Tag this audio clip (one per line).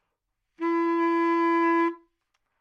baritone
Fsharp2
multisample
neumann-U87
sax
single-note